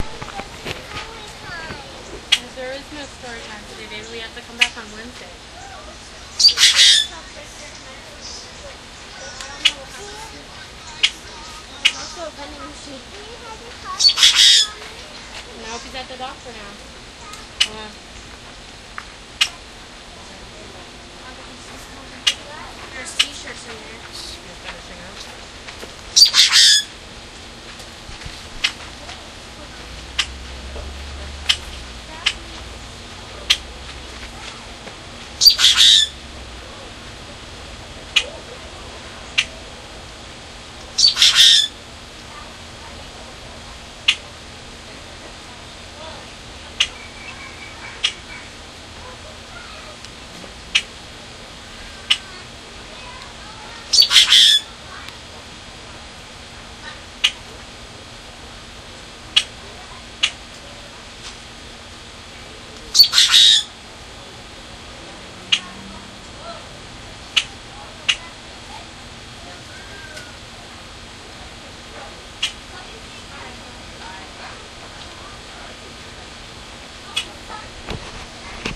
Bird singing at the Busch Wildlife Sanctuary recorded with Olympus DS-40.